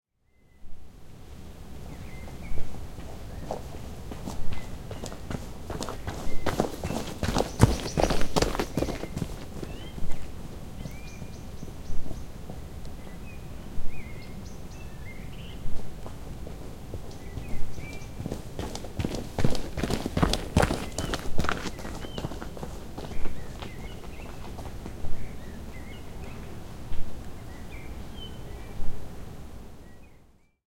I jogged past the microphone twice myself on a forest path for this sound recording.